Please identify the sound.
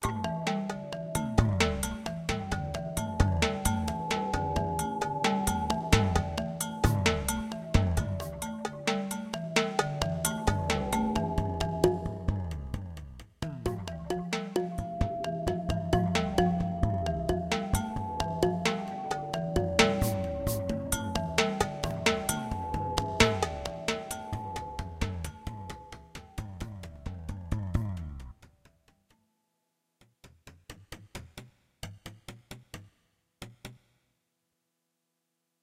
Vivace, con screamo - No Solo
bartok, con, screamo, vivace